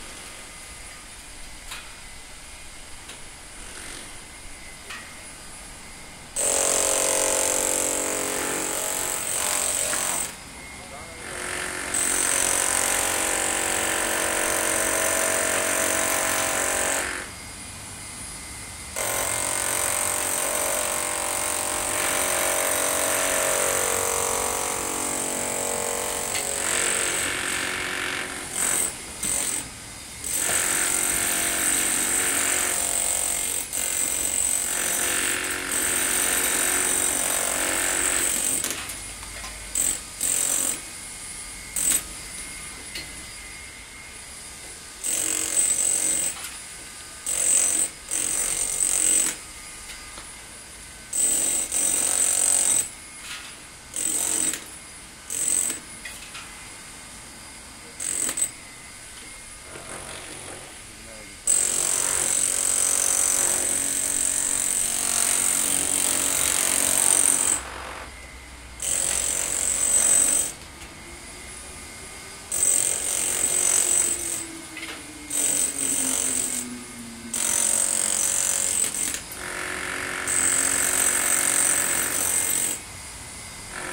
worker cutting grooves wall puncher
Worker cutting grooves wall using puncher.
Recorded 2012-10-01 01:30 pm.
hammer, grooves, puncher, wall, worker, pneumatic, rumble, noise, cutting